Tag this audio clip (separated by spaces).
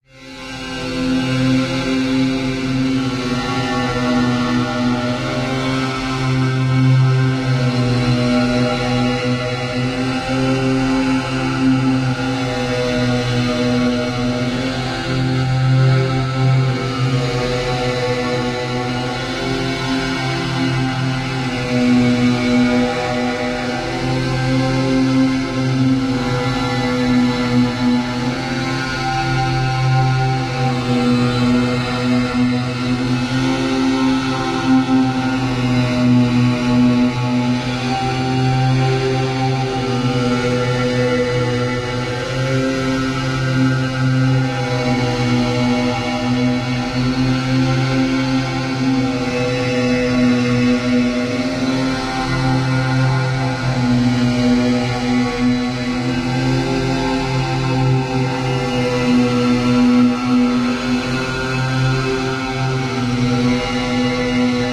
ambient creepy horror loop scary sinister spooky weird